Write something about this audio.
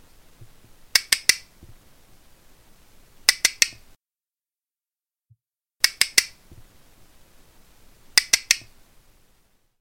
A Spanish castanets recording with noise removal after selecting a noise profile. At the end, a fade out.